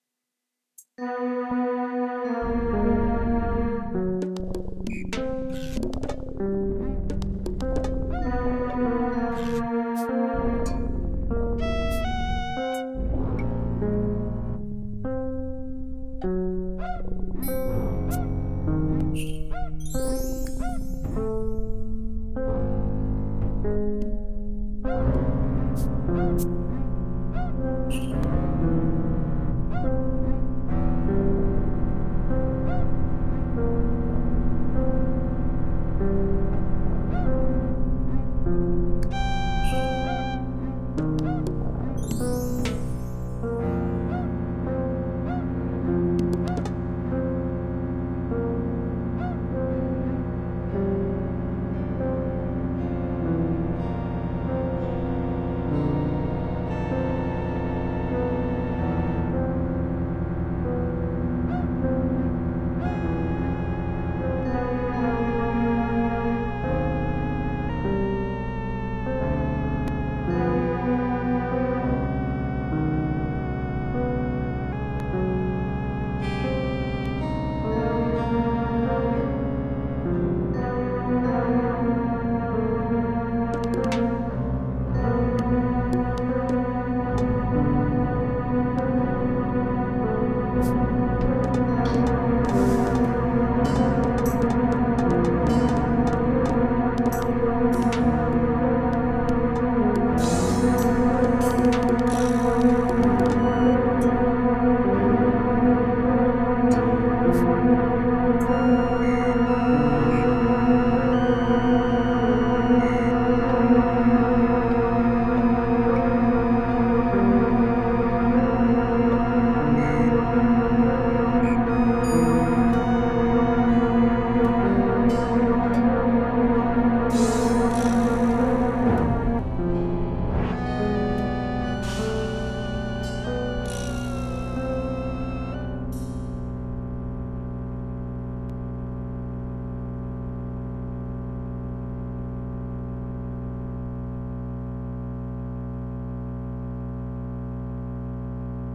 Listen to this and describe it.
Paranoia landing 1
This was originally a project for a class were I used a MIDI controller to input controller messages into a Korg NSR5 synthesizer. This is what I came up with it's all done with sliders and knobs. Enjoy!